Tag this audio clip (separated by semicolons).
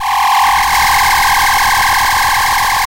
8bit; videogame